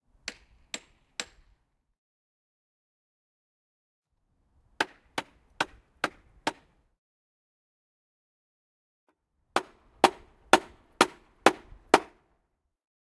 middle distace, outdoors
Recorded on ZOOM H1 recorder